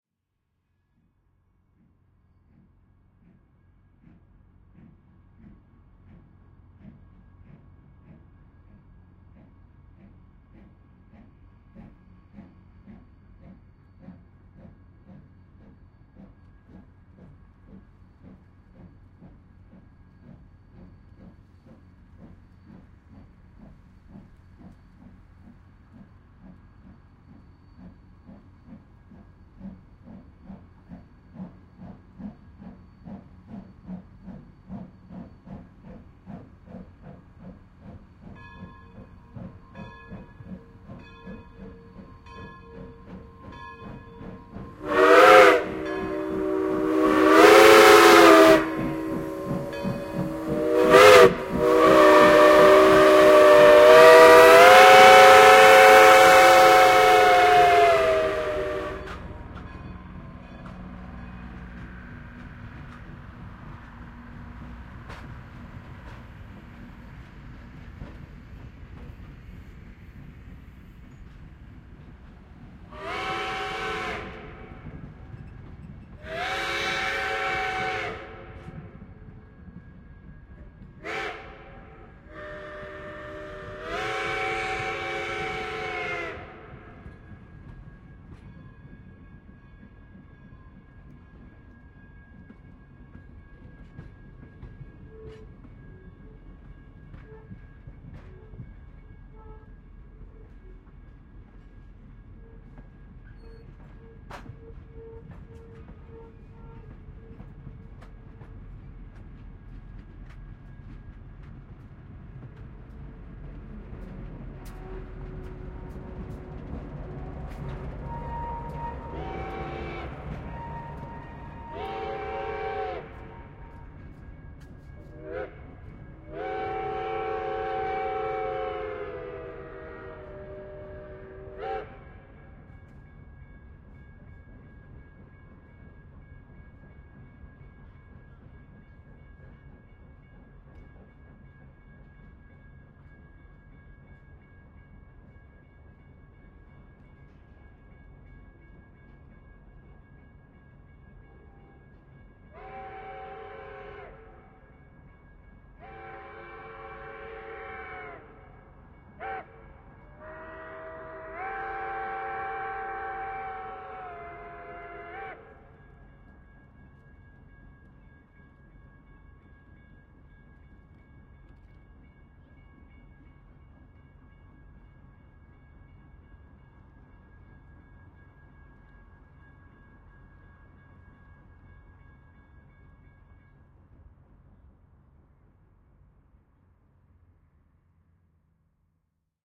TRNSteam Polson 2 Steam Train Leaving Station TK SASSMKH8020
The Polson #2 steam engine built in 1912 pulls out of the station pulling some passenger cars behind it. The whistle is quite loud and it blows a few times as it crosses a couple of roads as it moves into the distance. Crossing bells and urban traffic can be heard as well as people watching the train.
Microphones: Sennheiser MKH 8020 in SASS
Recorder: Zaxcom Maxx